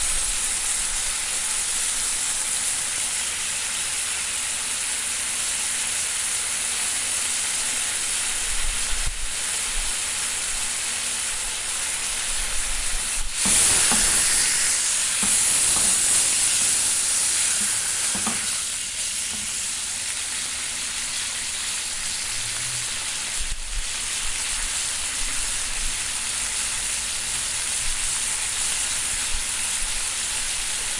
frying pan frying a steak
rain, raining